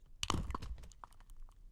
Alka-selzter dropped into glass of water, hyperreal, 8oz of water